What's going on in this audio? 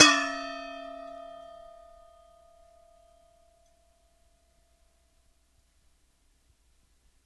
PliersBottom2-SM58-8inAway
Struck from the bottom again whilst hanging vertically from 1 wire, the 11 1/2" bowl were struck on the bottom with the needlenose pliers, with the Shure SM58 mic held approximately 8" away from the interior of the bowl.
11-5inchBowl, struckWithPliers